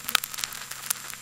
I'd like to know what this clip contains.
Noise on vinyl record
click, noise, record, glitch, vinyl